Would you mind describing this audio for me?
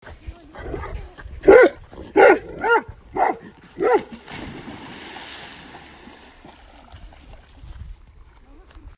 Dog bark with echo and splash
A dog barks loudly with an echo. Followed by a splash.
dog-bark, dog-echo